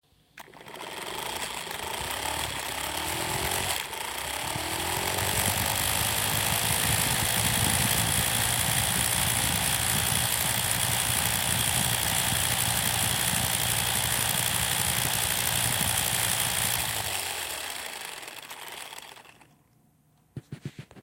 Engine Rev
A very cool revving sound that could be a car, a machine, a chainsaw, or whatever your heart wants it to be!
rev, cool, electric, revving, vehichle, motor, car